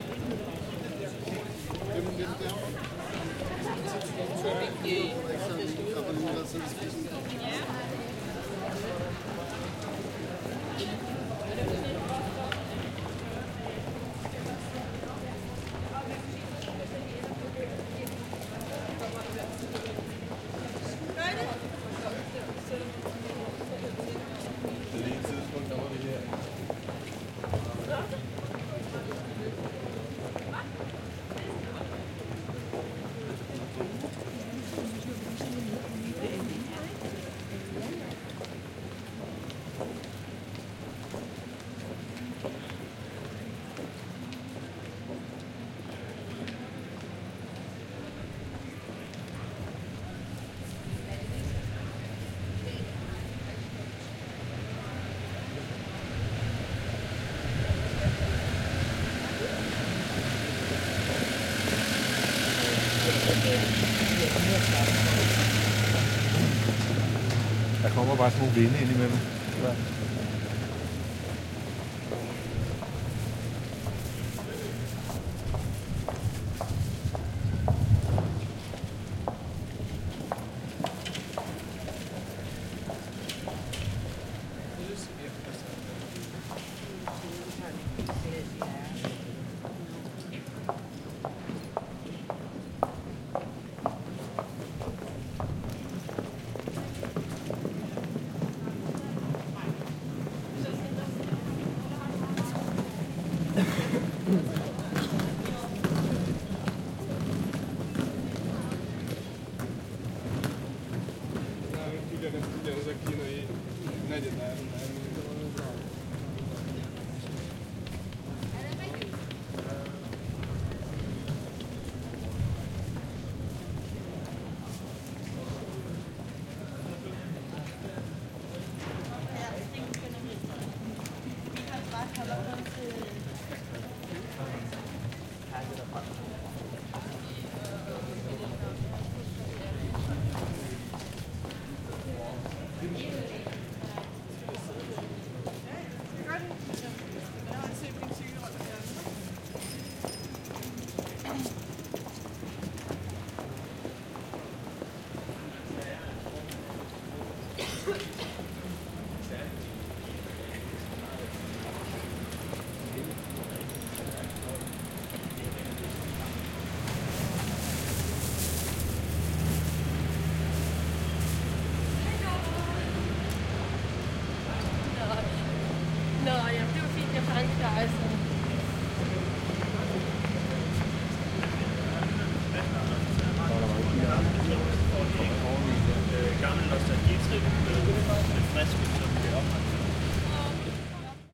An afternoon in a pedestrian street in Copenhagen. People walking by, a moped passing, distant bells.